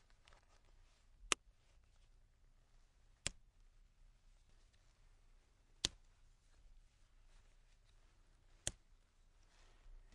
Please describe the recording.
Snap buttons
A metal snap-closure button being snapped open and snapped closed.
Recorded with a Blue Yeti mic on stereo. Raw, unprocessed audio.
snap, button, buttons